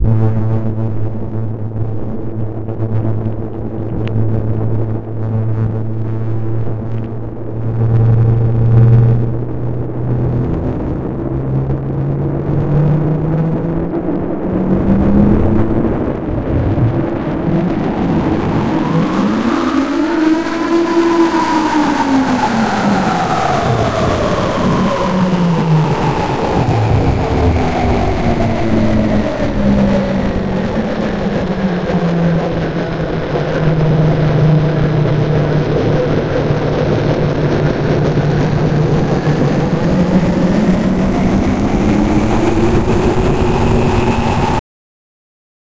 Space Ship Maneuvers
Generated sound of a space ship doing maneuvers, taking off, and landing. Created in Adobe Audition.
electronic, garcia, landing, mus152, off, sac, sci-fi, ship, space, take